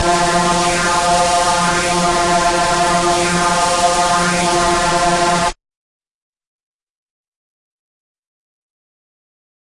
multisampled Reese made with Massive+Cyanphase Vdist+various other stuff